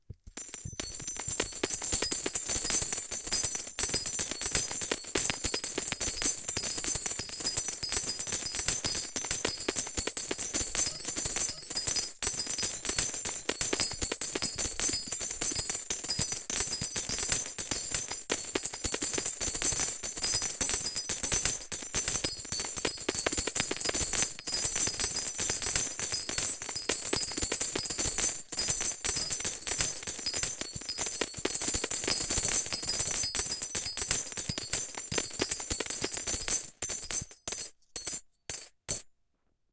Composite several recordings of large coins (silver dollars, Ike dollars, 50 cent pieces) dropped on a carpet from about 25cm. The aim was to make it sound like lots of money was falling out of a secret compartment - much like a slot machine.